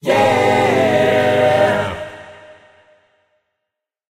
Recorded 7 Voices of me for an joyfull Yeah! Looking forward to HWT!